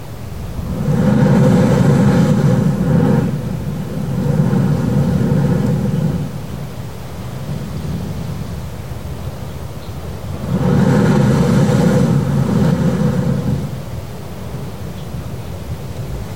Spouting Horn Kauai
Poipu South island Kauai: Spouting Horn is a jet of water which can be seen shooting up from the sea like a geyser. A gurgling and groaning noise accompanies this display.